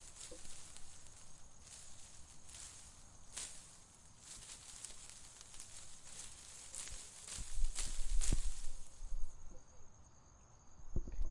Rustling Bushes
Bushes, Rustling